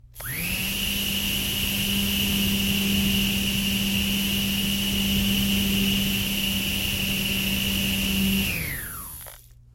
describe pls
I recently got a battery operated frother with a french press that I recently pruchased. I quickly found out that it had a cool sound to it and of course I sampled it.
frother, noise